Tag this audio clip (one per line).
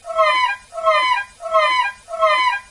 noisy
loop
dead
duck
violin